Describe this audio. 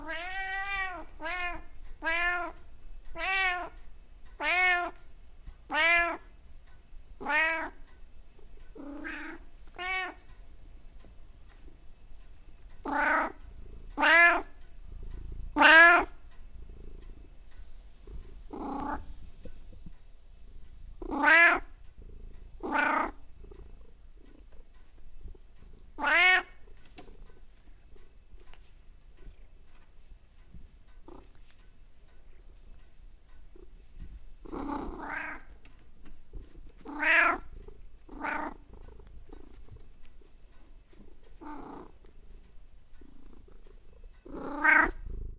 kot miauczaco mruczacy [Cats purr meow]
Rozmowa z moją kocicą. Albo jej opowieść. A może prośba o przytulenie? O jedzenie?
[My cat's story. Is she asking for something? Maybe for a hug? Oe something to eat?]
ZOOM H5